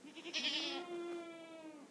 Two goats bleat. Primo EM172 capsules inside widscreens, FEL Microphone Amplifier BMA2, PCM-M10 recorder. Recorded near Monasterio de Tentudía (Badajoz Province, S Spain)
bleat, farm, field-recording, goat, lamb, sheep